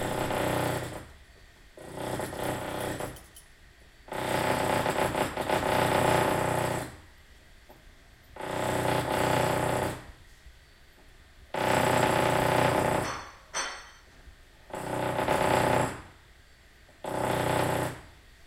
concrete block2
A pneumatic air tool (jackhammer) was used to lower some concrete blocks on the exterior of our garage. I was inside the house recording it with a Zoom H2 recorder. So this was recorded through an insulated wall. This is the shorter recording.
air, constructing, construction, drill, drilling, jack-hammer, pneumatic, tools